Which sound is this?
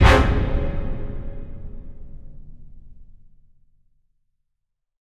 Cinematic Hit 1
A lot of effort and time goes into making these sounds.
An orchestral hit you might hear in a television show, movie, radio play, etc. I personally imagine hearing this when a vengeful hero lands a heavy blow on their sworn enemy.
Produced with Ableton.
orchestral-hit, cinematic-drama, brass-hit, orchestral-stab, dramatic-stab, timpani-hit, dramatic-hit, foley, one-chord-hit, foley-sound, dramatic-moment, shocking-moment, cinema, cinematic-hit, movie-sting, movie-hit, epic-moment, orchestral, megapint, effects, drum-hit, epic-sting, strings-hit